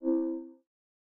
a user interface sound for a game